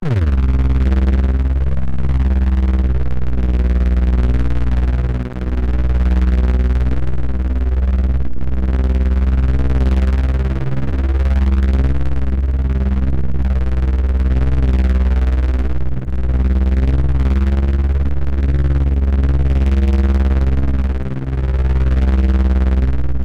Synth - Helicopter
I know it may not sound much like a chopper but I've used it to create a similar kind of ambience in previous electronic productions.
I only ask that you do this because I love seeing creativity in action!